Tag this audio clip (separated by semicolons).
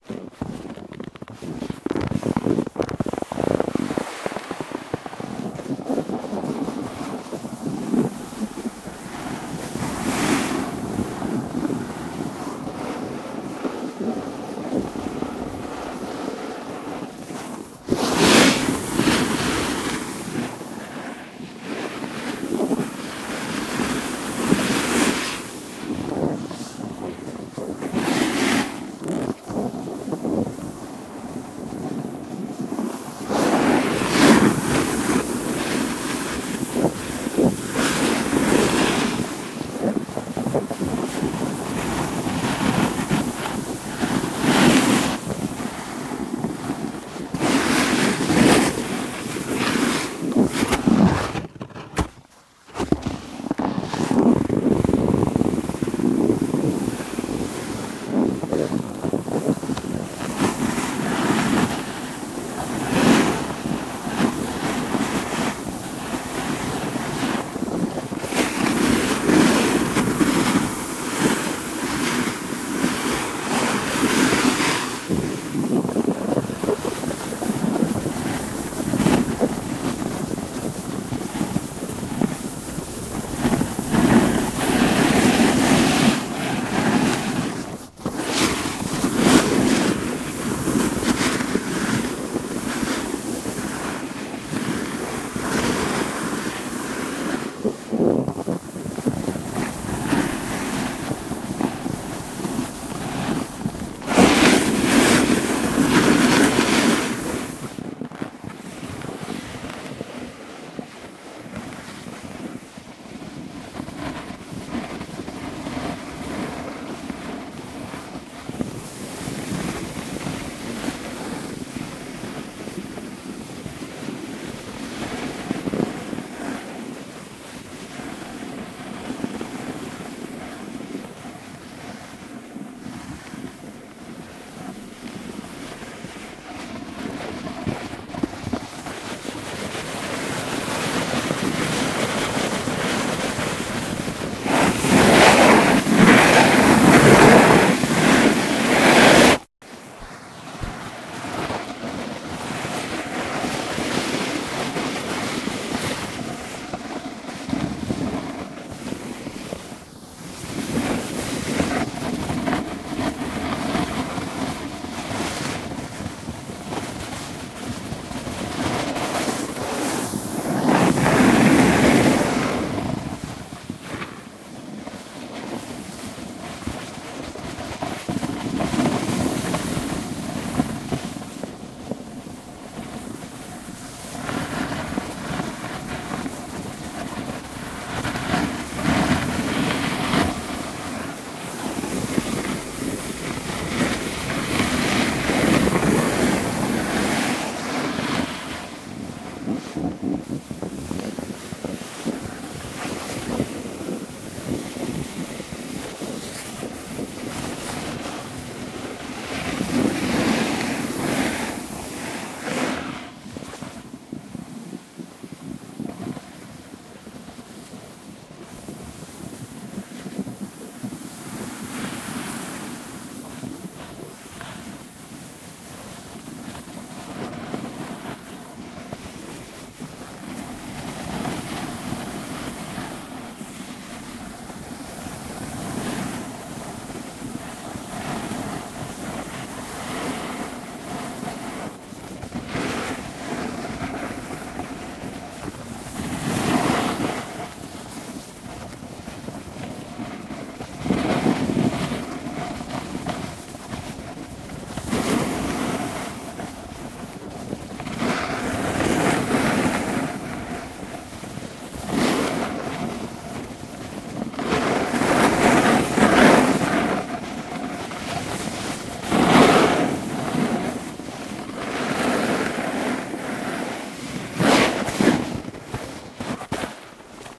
loop; winter-sport; winter; snowboard; gliding; glide; sliding; snow; field-recording; slide; ice